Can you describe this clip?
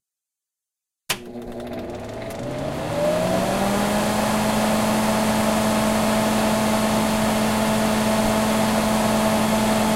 Stove Overhead Fan (High)
Stove overhead fan on high
fan, high, kitchen, overhead